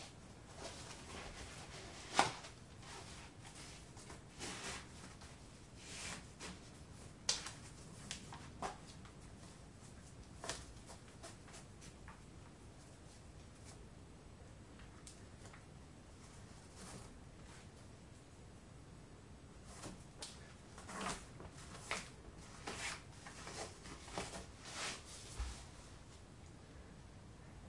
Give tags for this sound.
untying clothing-and-accessories tying shoes

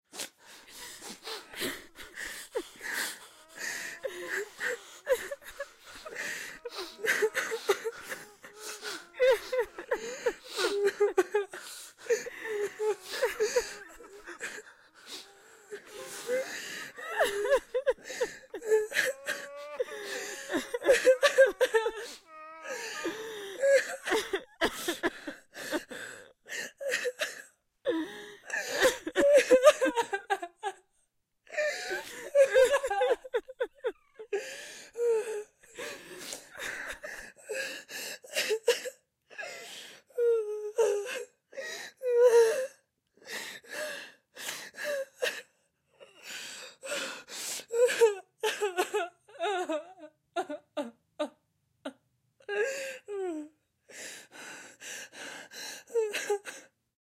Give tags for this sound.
sad group crying

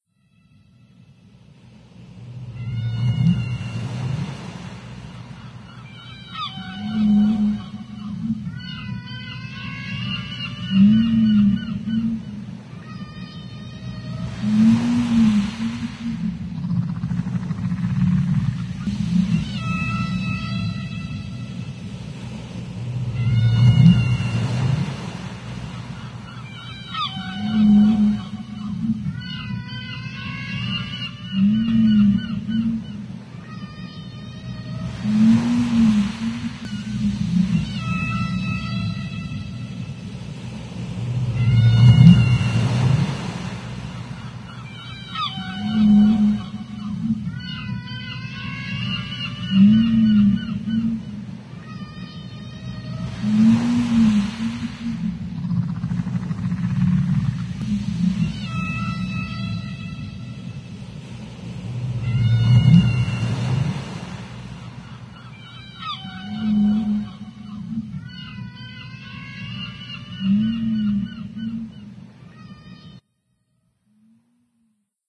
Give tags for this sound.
water waves whales

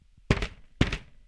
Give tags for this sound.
toon falling fall

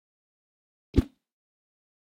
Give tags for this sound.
swoosh whip

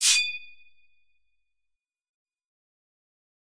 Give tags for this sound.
aggresive ancient antique blade fast katana knife knight medieval metal mix old pull slash soldier sword terror torture war

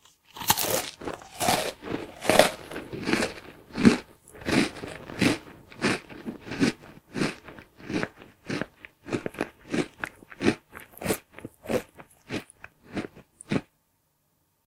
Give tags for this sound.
crunch snack eat chew chewing biting bite crunchy munching chomp eating cookie chips crumble foot munch

